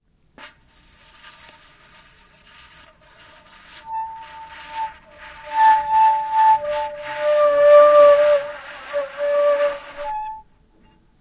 Kaval Play 13
Recording of an improvised play with Macedonian Kaval
Acoustic, Instruments, Kaval, Macedonian